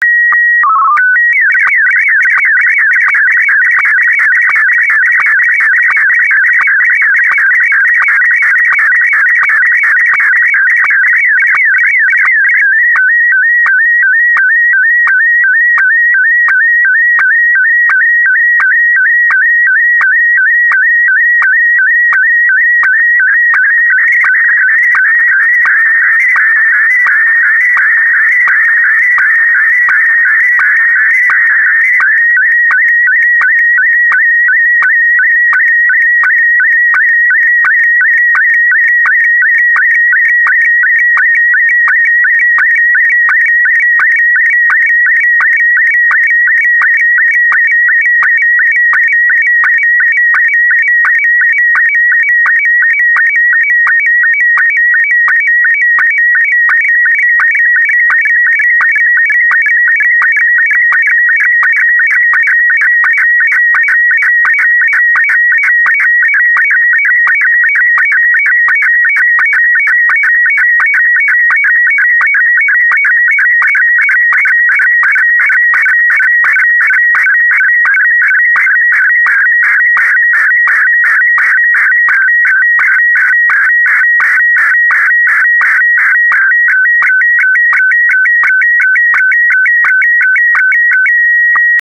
SSTV - Slow-scan television image. Original photo created by me, used MultiScan on Mac OS X via Soundflower to Audacity to convert image to Martin HQ1 SSTV format.